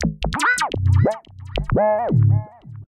Big Nose
The sound of A Human Balloon picking it's nose.
spacey, bouncy, picky